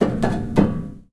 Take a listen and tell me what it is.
Metal Object Soft Hits
Tapping on metallic object. Recorded in stereo with Zoom H4 and Rode NT4.